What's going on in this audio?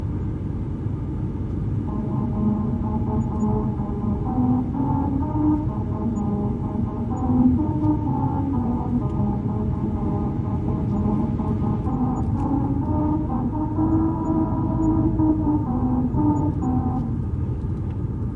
The musical road outside Lancaster, California. Recorded in trunk of car.